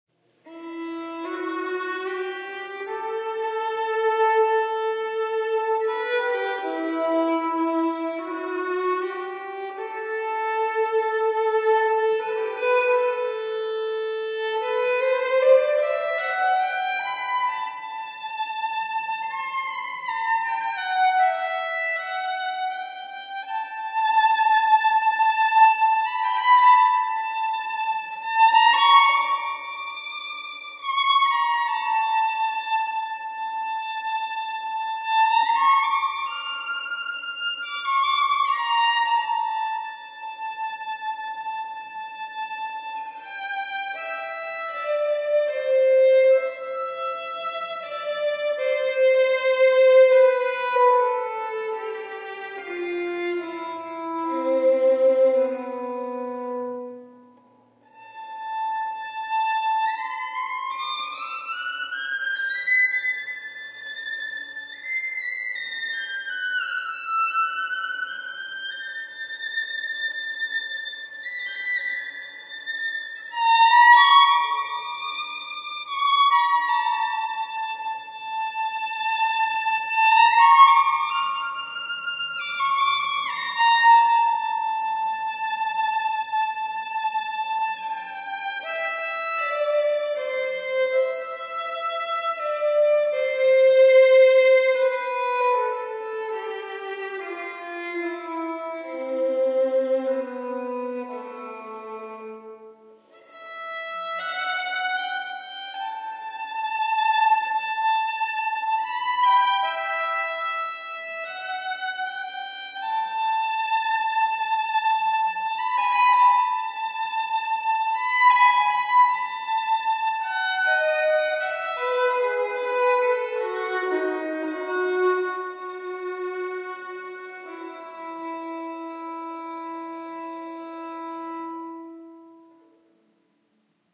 The same piece, but added some concert hall reverb.
This can be perfect to use for a sad and funeral scene for a movie or a podcast story.